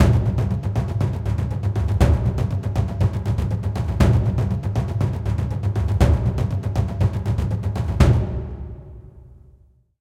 Taiko Drumloop 002 [With Ending] (120)
Taiko Drumloop 002 [With Ending] (120bpm)
120-bpm, action-percussion, cinematic-percussion, drums, hollywood, loop, percussion-loop, rhythmic, taiko